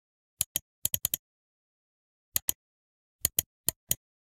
Mouse clicking 002
High quality recording of a computer mouse...